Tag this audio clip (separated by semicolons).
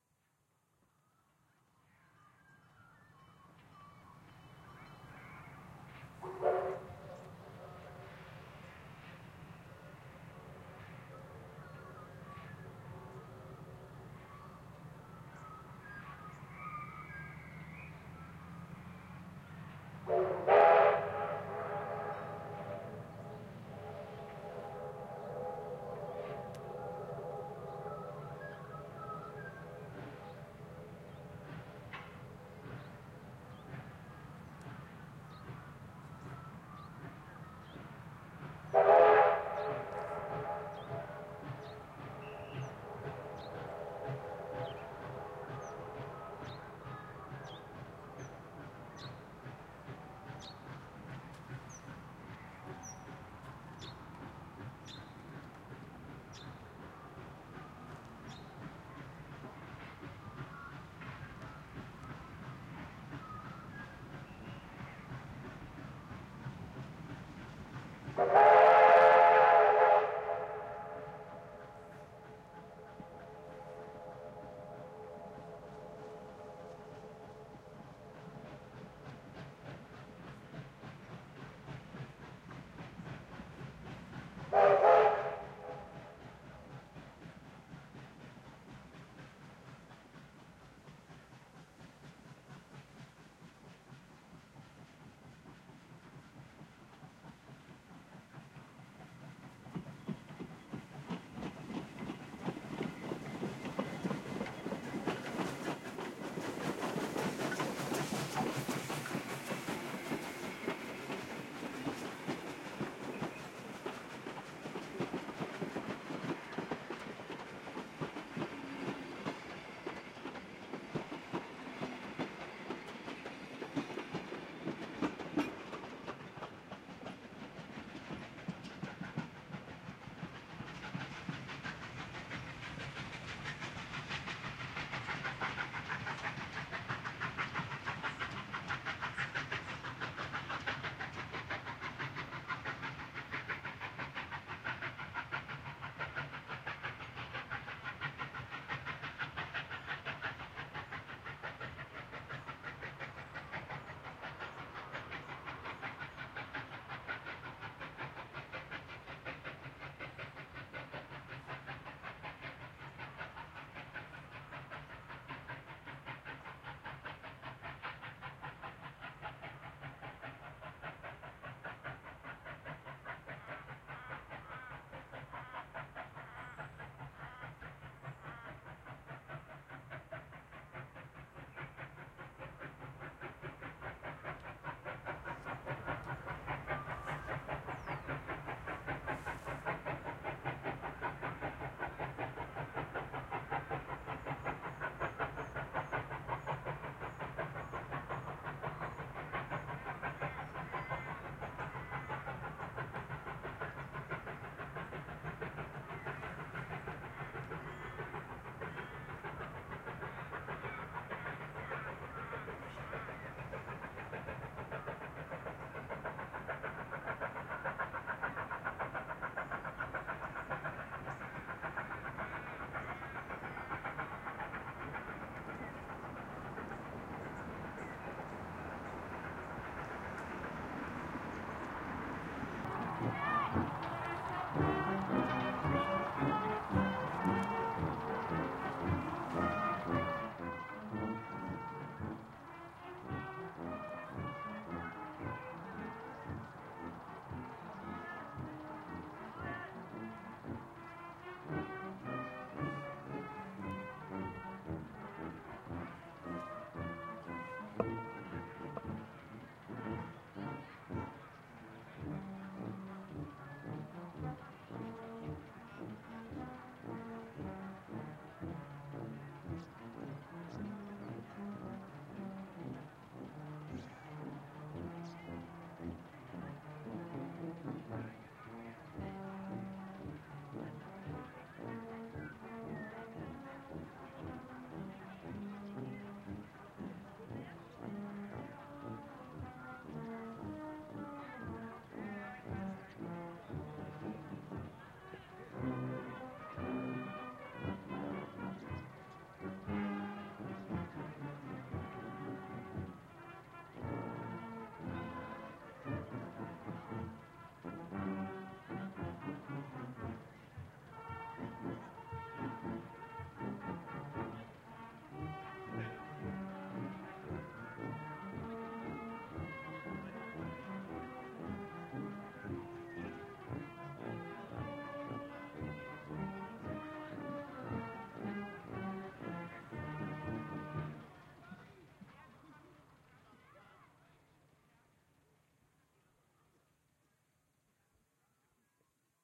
bird; dawn; australia; birds; brass-band; train; ambience; atmos; nature; australian; Steam; field-recording; atmosphere